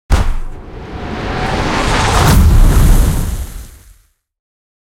Mage FireBall Skill
Pyroblast, spell, effect, mage, Flamestrike, Blast, flame, Ignite, burn, fire, fireball, burning, Scorch